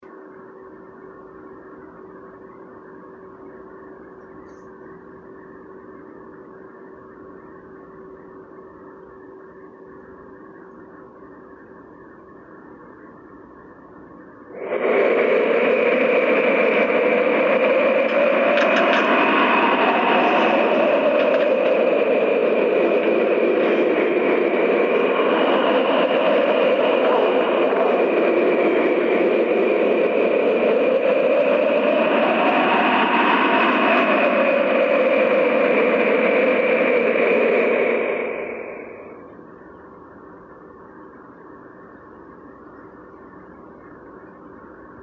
sound of wind